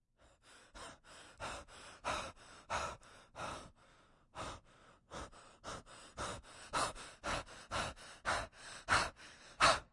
Heaving Breathing
Either someone tired or scared heavily breathing
boy, breathing, frighting, guy, heavy, male